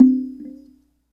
T2 MULTISAMPLE Recording of a wooden tone drum in a music store with a sony DAT walkman and a mono sony mic. Sampled and trimmed with a k2000
acoustic, ethnic, multisample, tone